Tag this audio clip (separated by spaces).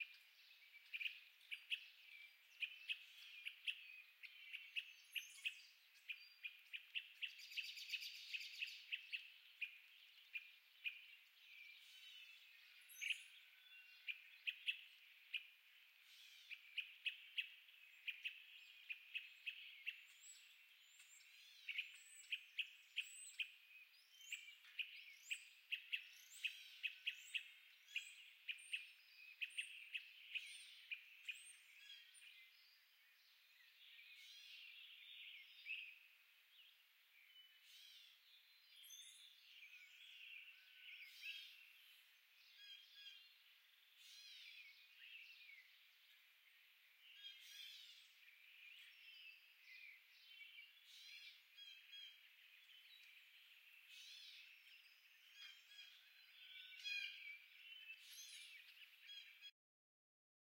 chirping field-recording rural Birds Country